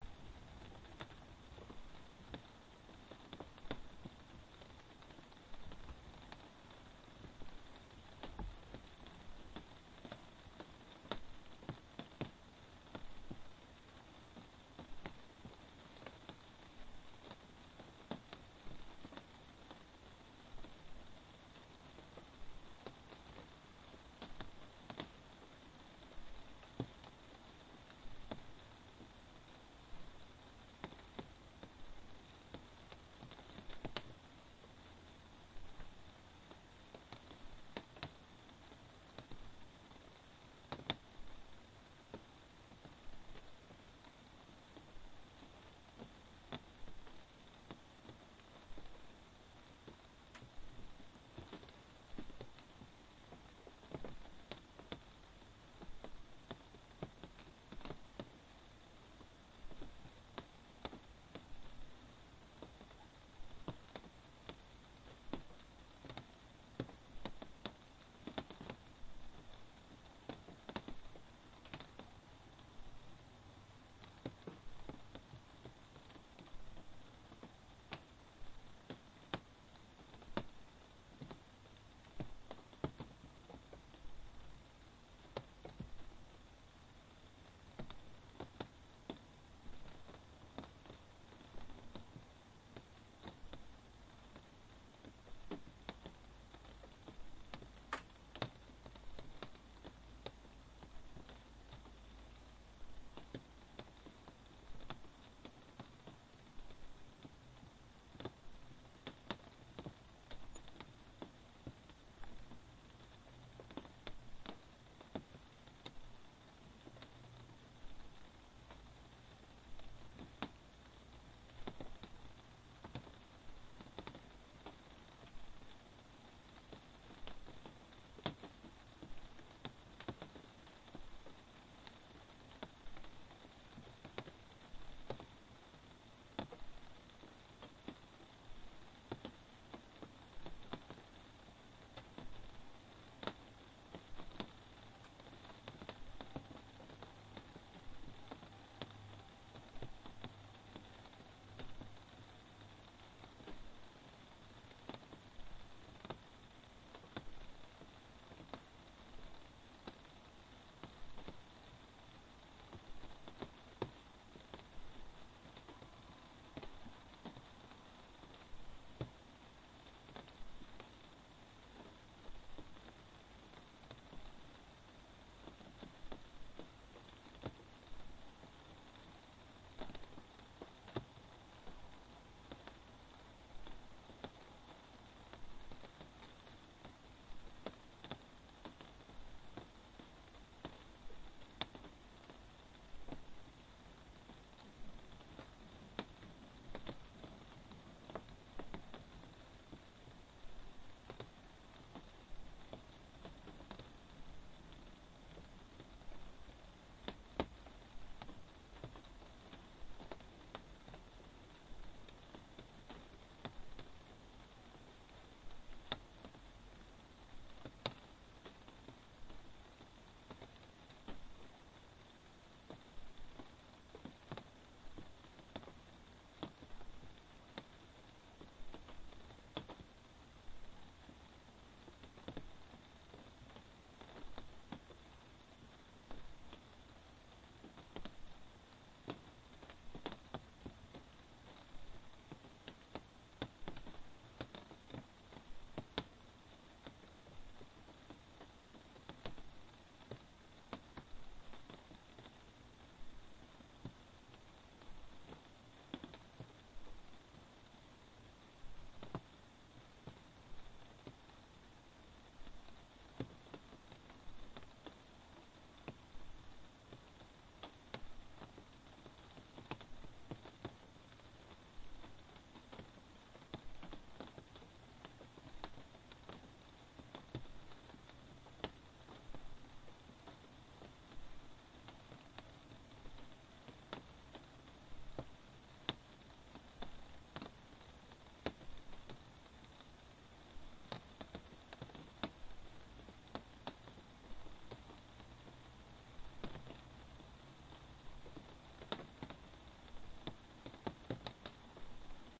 Rain on rooftop